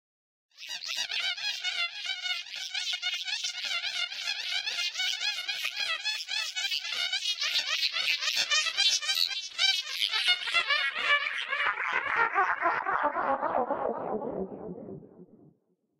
The scream male_Thijs_loud_scream was processed in a home-made convolution-mixer (Max/MSP) where it was mixed with the convolution of it's own sound, but at different times. Afterwards added the vocal transformer from logic express and an EQ for the plastic-like sound.